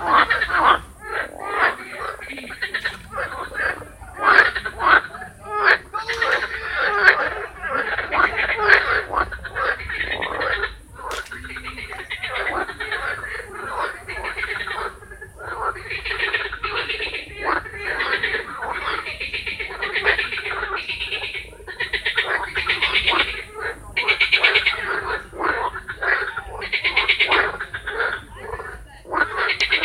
concert of frogs in a pond